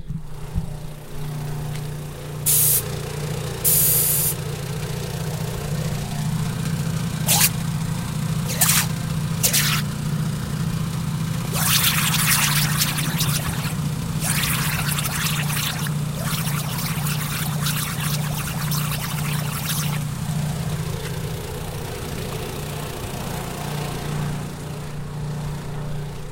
After noticing the air compressor completely drowned out the cool sounds I though I was recording (the air entering the tire) I wanted to get some air burst noises and the earlier rain made a nice puddle so I blew bubbles too. Recorded with my HP laptop and a Samson CO1U USB mic.
car, field-recording, air, gas-station, automotive, tire